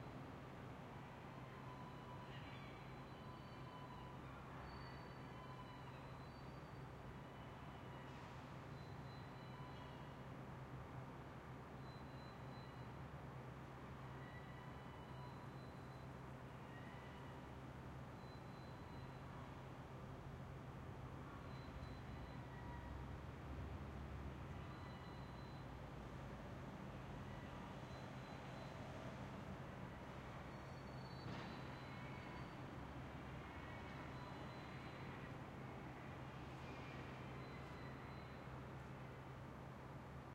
Crowd Noise morning 2
A selection of ambiences taken from Glasgow City centre throughout the day on a holiday weekend,